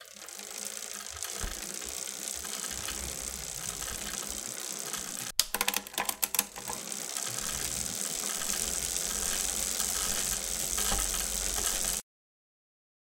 Mountain-Bike Gear-Change Shifter